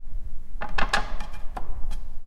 Prison Locks and Doors 25 Fiddling with shutter
From a set of sounds I recorded at the abandoned derelict Shoreditch Police Station in London.
Recorded with a Zoom H1
Recorded in Summer 2011 by Robert Thomas
doors, latch, lock, locks, London, Police, Prison, scrape, Shoreditch, squeal, Station